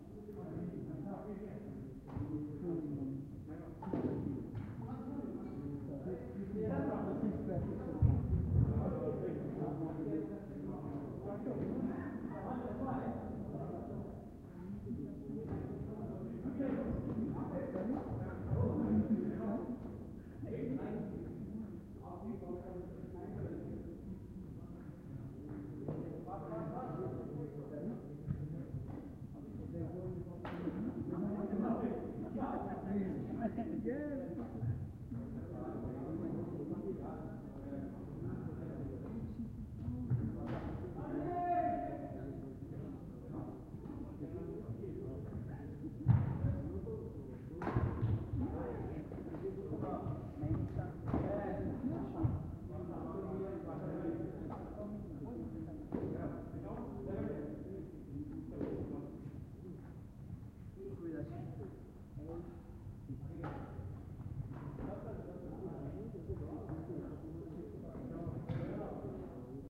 People playing badmington indoors in the local sports centre.